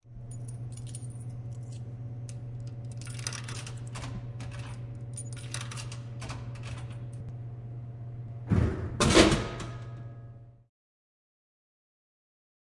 Coins, followed by a delivery from a vending machine.